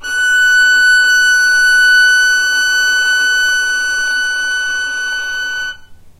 violin arco non vib F#5
violin arco non vibrato
non, vibrato, violin